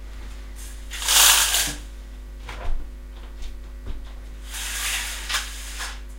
i closed the window just before the gargling recording. blinds go up window closes and then blinds go down. Sony ECM-DS70P Mic to a Sony minidisc MZ-N710 acting as a preamp into my Edirol UA-25 audio interface.
blind, bang, swoosh